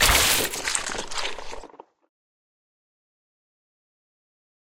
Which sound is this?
Gut Rip (Unbladed)
The unbladed version of the Gut Rip, made without the "schwing" sample. A juicy and slightly comical gore effect.
By the way, changing the rate of this sample gives some alternatives - these alternatives make the sound more akin to a impact sound if sped up or "gore explosion" if slowed down.
The articles used were out of date eggs.
blood, cartoony, comical, flesh, gore, gut, guts, rip, squidgy, tear